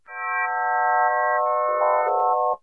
stockhausen reenactment, made in pd. The original was a fysical contruct, this was re-created in Pure Data